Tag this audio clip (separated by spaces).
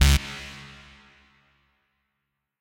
reverb saw